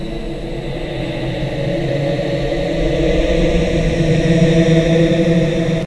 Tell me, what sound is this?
MAIORE Cédric 2015 2016 phantomShip
Recording of a laugh modified in Audacity with four effects (change pitch, paulstretch, reverse, echo).
Typologie : V
Masse : Son cannelé
Timbre harmonique : Doux, fluide
Grain : Lisse
Allure : Régulière, continue
Dynamique : Douce et graduelle
Profil mélodique : Serpentine, pas de chute
aliens, echo, fear, horror, phantom, spaceship, voice